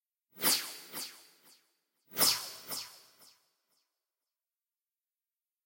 A couple laser zips I made for a graphic. Go well with quick, light, small zips.
quick, laser, zip, woosh, zing